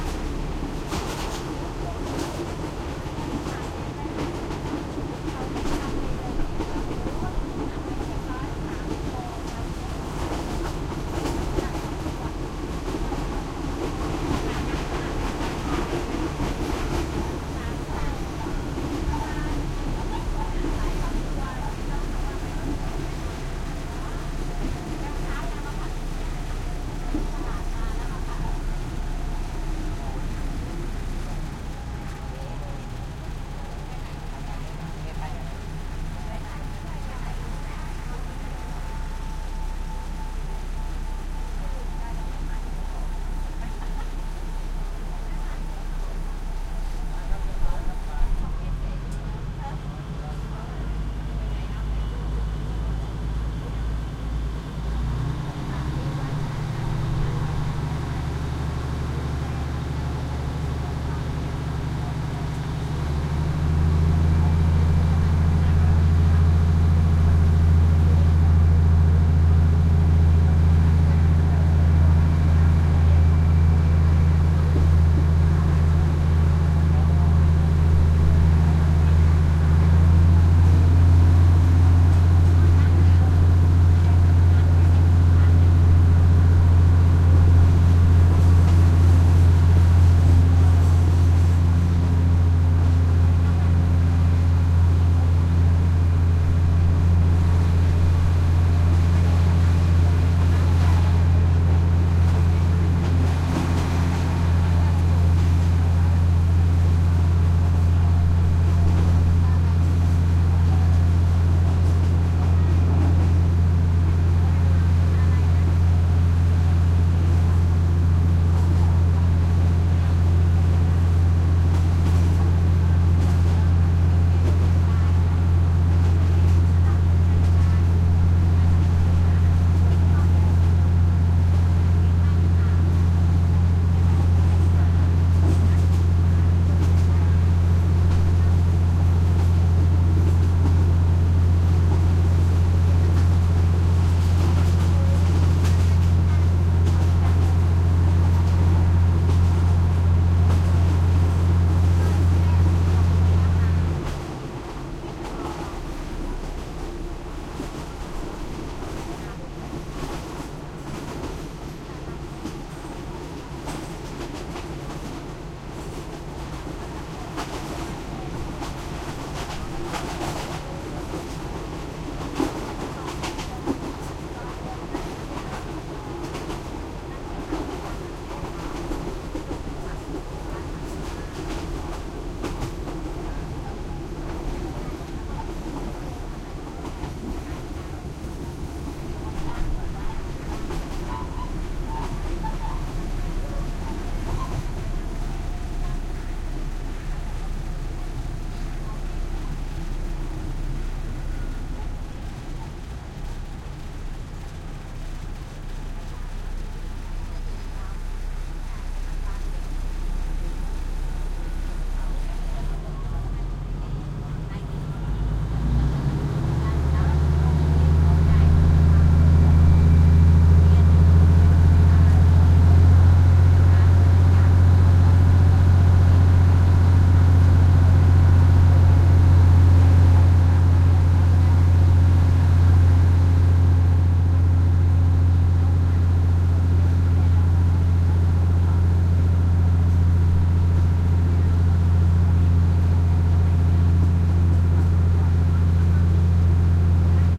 Thailand passenger commuter train open air on board thai chatter walla start stop travelling various, facing door for balanced track movement and loud engine10
Thailand passenger commuter train open air on board thai chatter walla start stop travelling various, facing door for balanced track movement and loud engine
chatter, passenger, walla, commuter, field-recording, onboard, Thailand, open-air, train